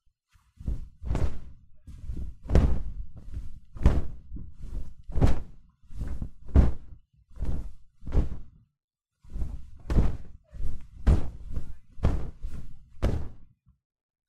Huge wing flaps for bird, dragon, dinosaur.
The sound of huge flapping wings. Bed sheets recorded with Oktava mk012 + Zoom F3.
dinosaur, dragon, flap, flapping, flight, fly, flying, monster, wing, wings